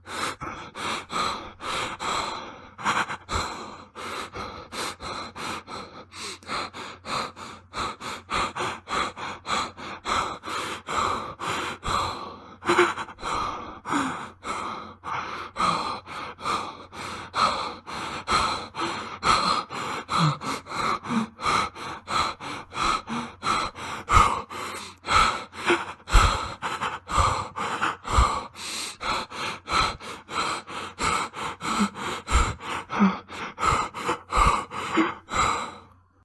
Scared Male Heavy Breathing
A man's scared, arrhythmic breathing; good for horror.
Came here to find the sound of a male's scared breathing, couldn't find one I could use and instead recorded myself. Figured I might as well upload it for anyone else who'd need it for their morally questionable projects too, so enjoy!
afraid, breathe, breathing, frightened, heavy, male, scared